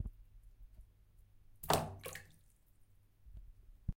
small stone in water
small stone fall to river
stone, river, fall